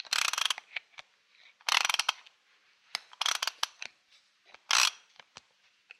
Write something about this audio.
Ratchet - Stahlwille - Tighten 4
Stahlwille ratchet tightened four times.
bolt, metalwork, stahlwille, tools, ratchet, 4bar, nut, 80bpm